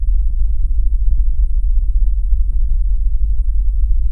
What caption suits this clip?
Lower than usual rumble, made from white noise in Audacity.
ambient, sinister, cavern, scary, atmosphere, ambience, bass, spooky, loop, deep, anxious, horror, dark, terror, background, hell, subtle, terrifying, atmos, low, cave, creepy, noise, ambiance